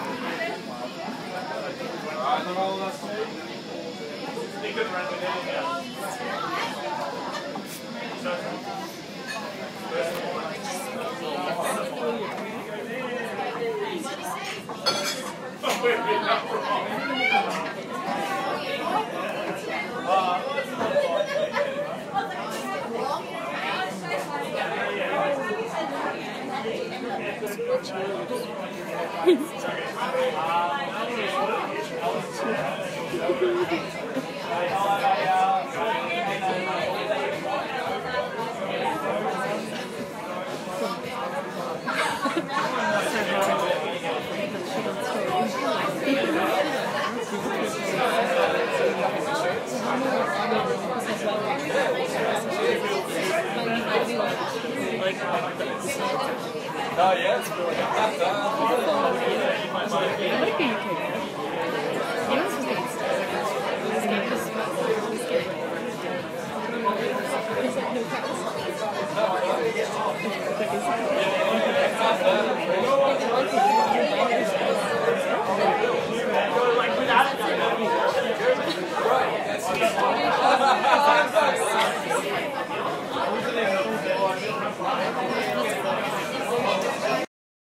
Atmos of a popular bar in the Western burbs of Melbourne, recorded on an iPhone.